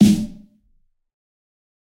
fat snare of god 024
This is a realistic snare I've made mixing various sounds. This time it sounds fatter